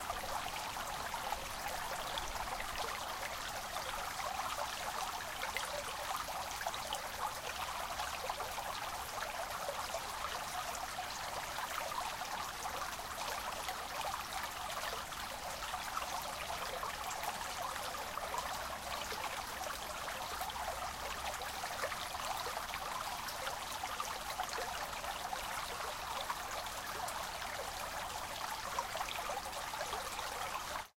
Country stream. - Recorded with my Zoom H2 -